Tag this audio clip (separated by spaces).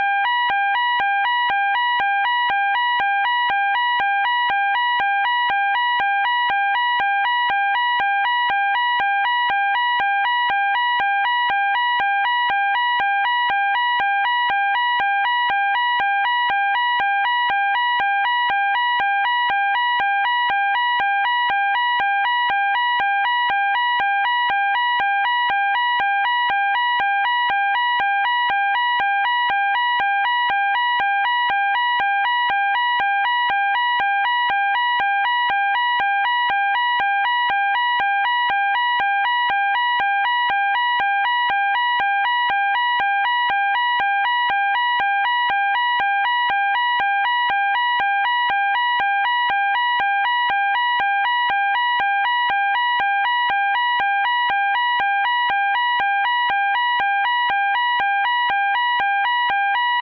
British Fire Siren